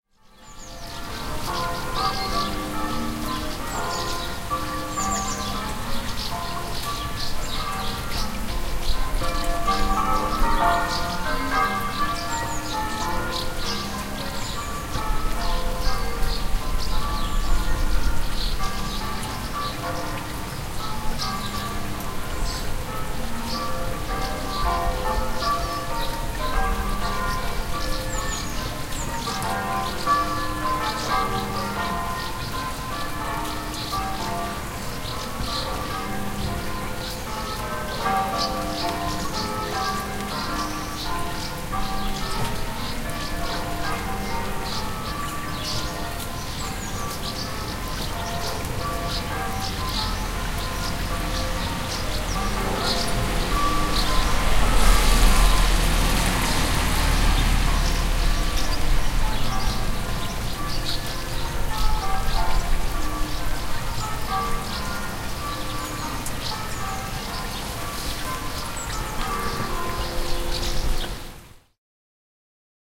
Rode Bells
Recorded in the village of Rode, Somerset, UK. Church bells (roughly half a mile away), rain, running water, birdsong, passing car.